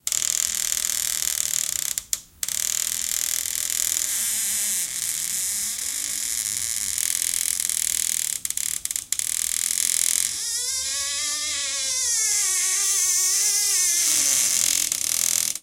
Recording of the hinge of a door in the hallway that can do with some oil.